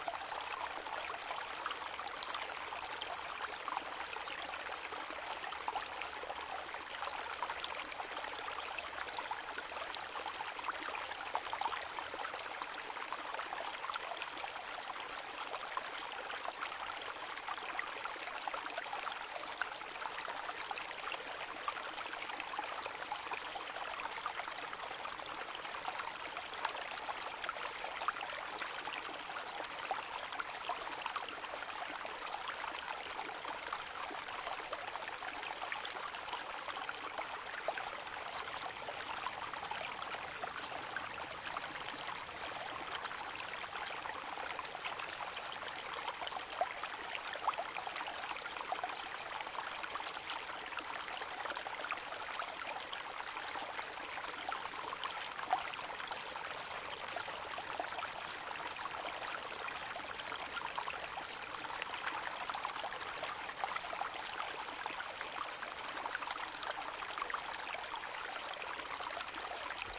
Ambiance Brook Calm Stereo
Sound of a quiet brook.
Gears: Zoom H5
water, ambient, nature, relaxing, field-recording, calm, ambience, river, quiet, brook, stream, white-noise, background-sound, ambiance, soundscape, general-noise